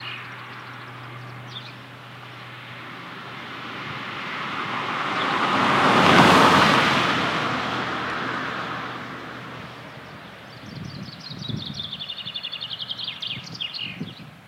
Sound of a passing car. Recorded with a Behringer ECM8000 lineair omni mic.
passing, purist, field-recording, car, traffic, road, cars